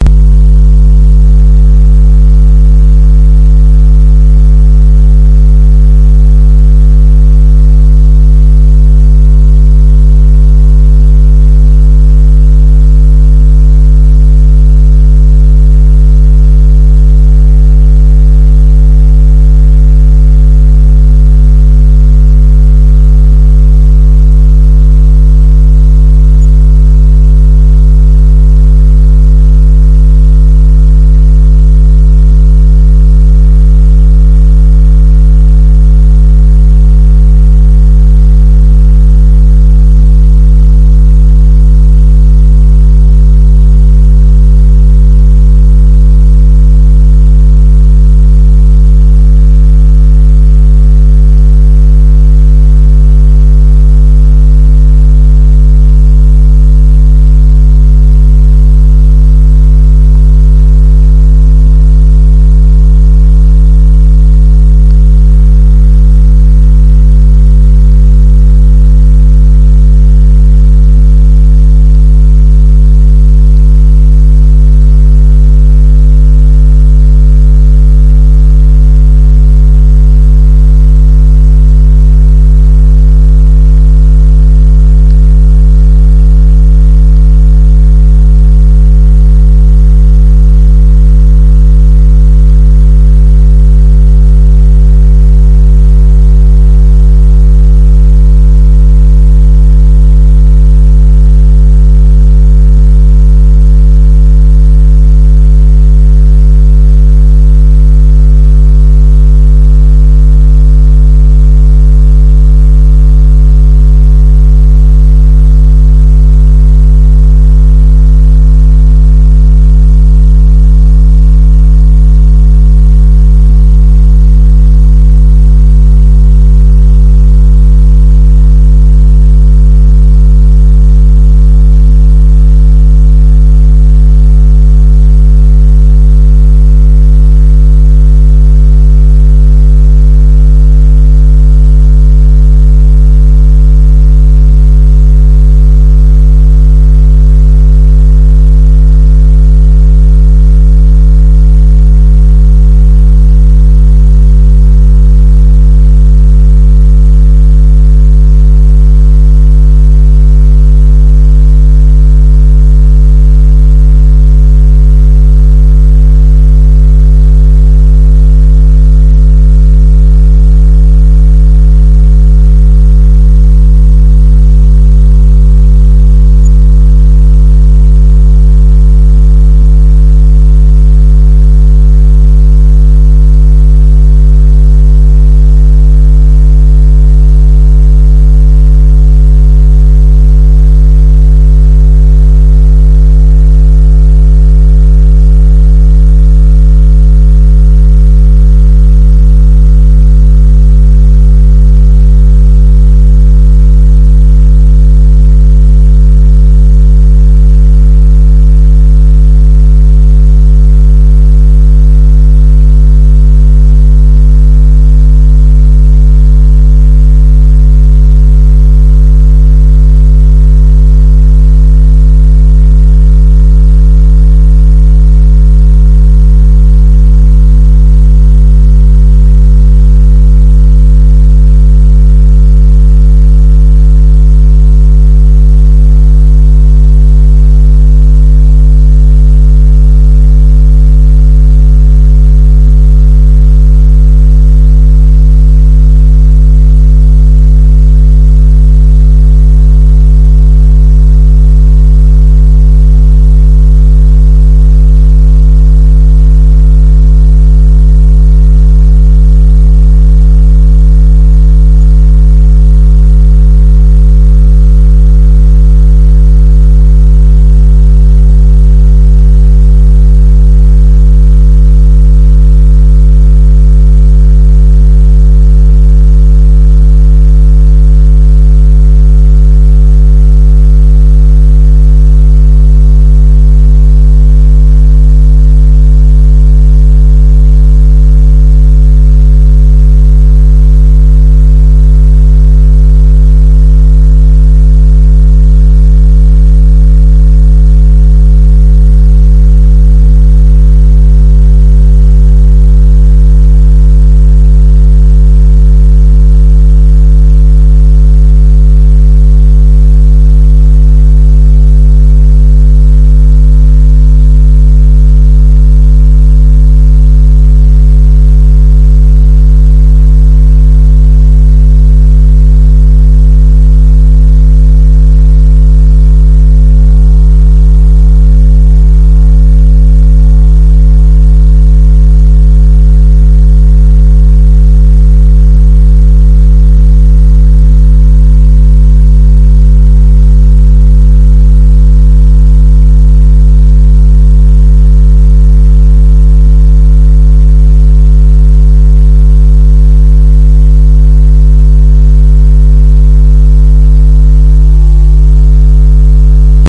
ECU-(A-XX)82+
Engine Control Unit ECU ATV Trail Running Type Triphase Fraser Lens Raspberry Amstrad CPC Chipset Tune Echo Wavelength Iso Synchronous Whirlpool Power Battery Jitter Grid Way ICU Ad Hoc